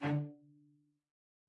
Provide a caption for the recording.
One-shot from Versilian Studios Chamber Orchestra 2: Community Edition sampling project.
Instrument family: Strings
Instrument: Cello Section
Articulation: spiccato
Note: D3
Midi note: 50
Midi velocity (center): 31
Microphone: 2x Rode NT1-A spaced pair, 1 Royer R-101.
Performer: Cristobal Cruz-Garcia, Addy Harris, Parker Ousley
cello, cello-section, d3, midi-note-50, midi-velocity-31, multisample, single-note, spiccato, strings, vsco-2